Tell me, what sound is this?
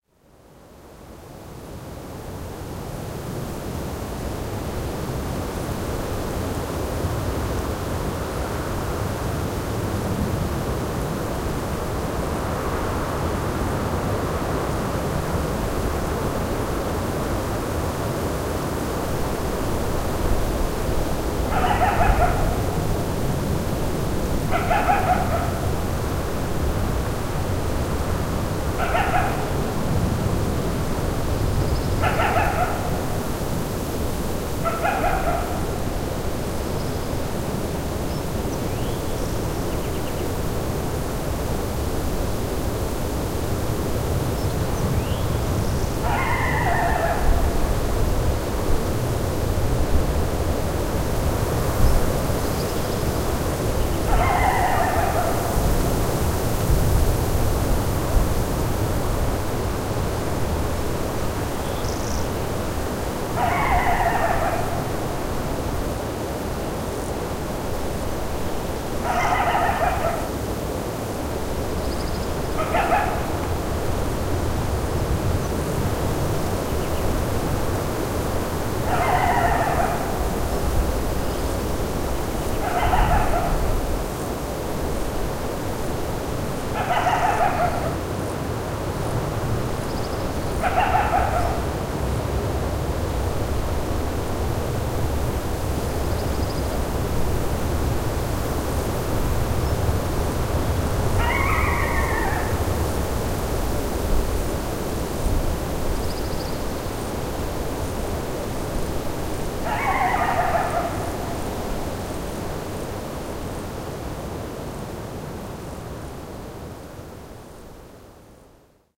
Wind blowing through the jagged terrain of Desolation Wilderness, a coyote is heard calling in the distance. Desolation Wilderness, Sierra Nevada mountains, California, USA
animal, bark, California, call, canine, Canis, coyote, desolation, field-recording, forest, granite, hike, hiking, howl, latrans, mountain, mountains, natural, nature, Nevada, range, Sierra, Tahoe, trek, trekking, wild, wilderness, wildlife, wind, yip